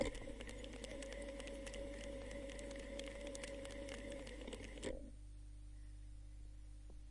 Queneau machine à coudre 22
son de machine à coudre
machinery, POWER